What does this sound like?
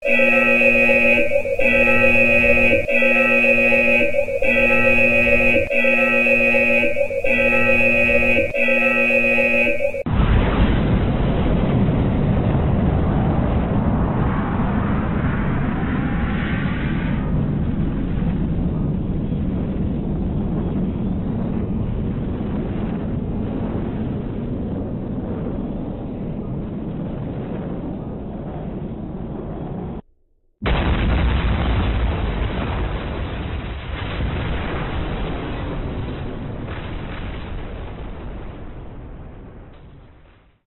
Nuculear Bomb sequence
Bomb, Explosion, Nuclear